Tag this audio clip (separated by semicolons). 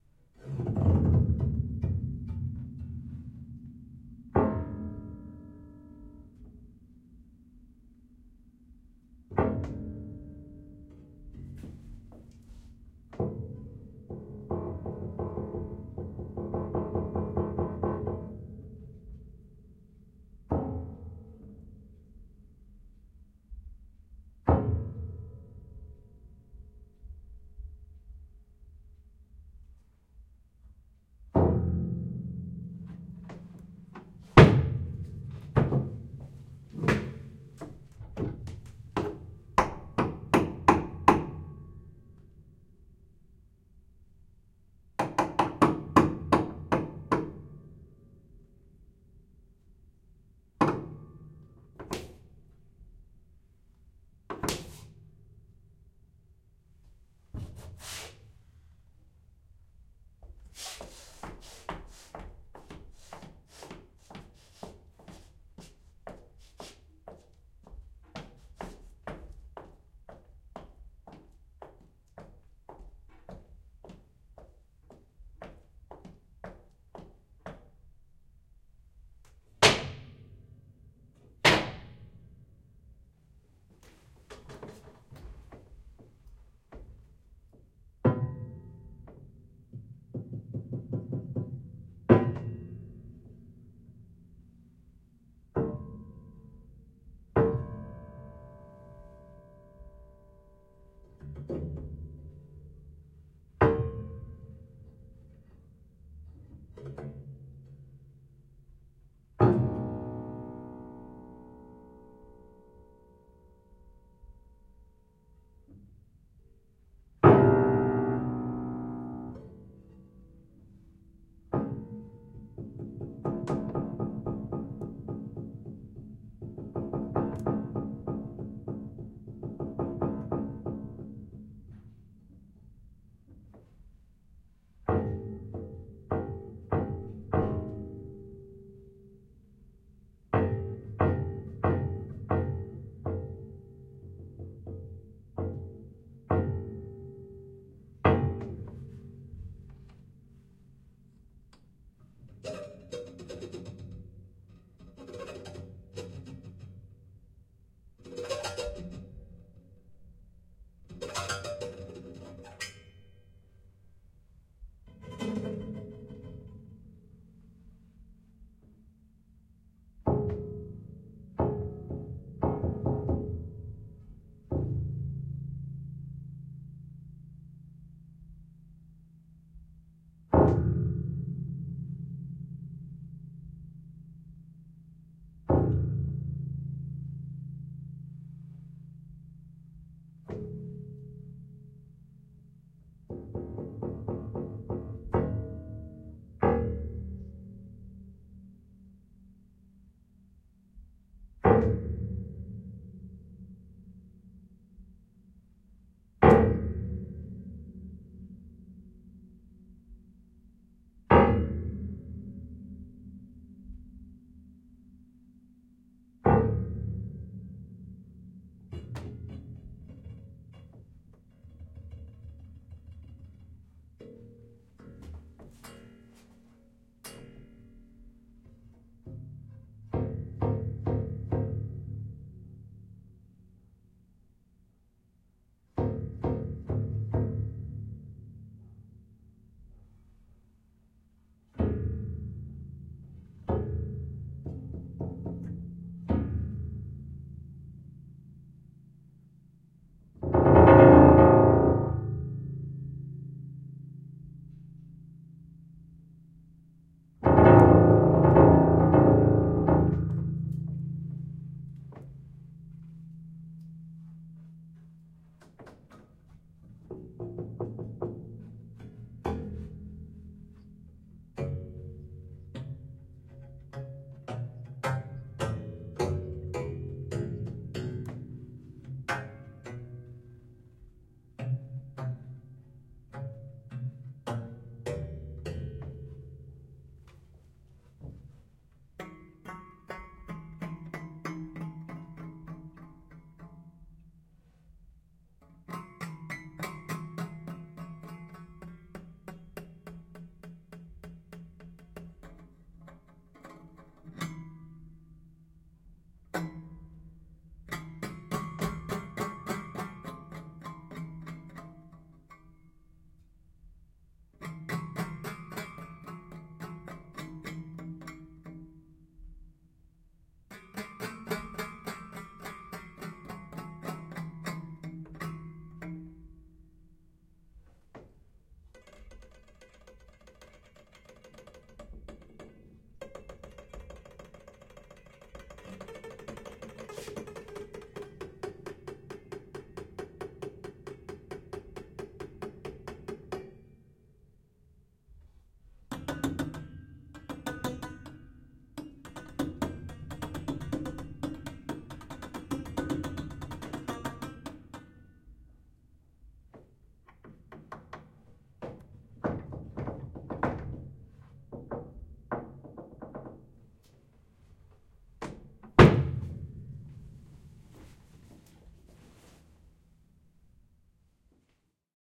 experimental; improvisation; Rode-NT4; xy-stereo; dark; small-room; upright-piano